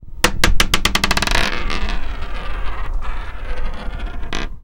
A marble dropped onto a desk, rolls a bit, hits an edge and stops. The original recording has been edited to improve and lengthen sound.
rolling, marble